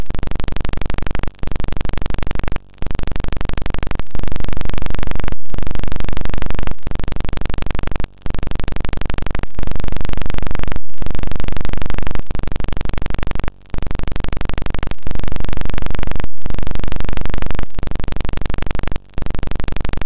These clips are buzzing type audio noise.
Various rhythmic attributes are used to make them unique and original.
Square and Triangle filters were used to create all of the Buzz!
Get a BUZZ!